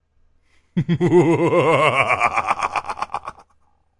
Maniacal Laugh 3
Varying Maniacal Laughter
crazy, laugh, maniac